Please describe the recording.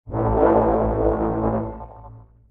A short drone sound. Can be use for alarm or in space ship.
Made in Samplitude 17 with synths and a door's sound registered with mic Audio Technica ATM33a
alarm, drone, future, science-fiction, space-ship